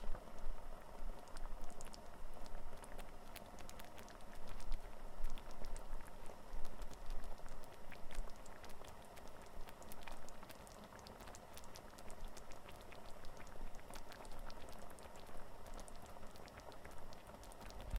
Cooking, boiling
boiling, cook, cooking, food, fry, frying, kitchen, oil, pan, pot, sizzle, sizzling, stove